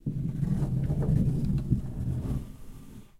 sliding wooden door close mic follow door scrape 2
a wooden sliding door being opened
close, door, metalic, open, scrape, shut, sliding, wheels, wooden